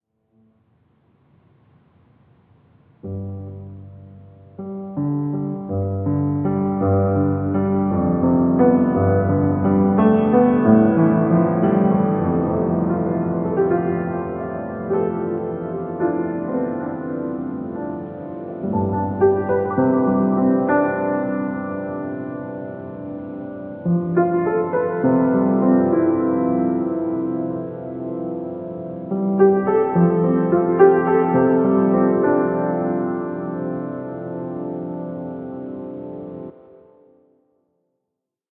Piano Lonely dance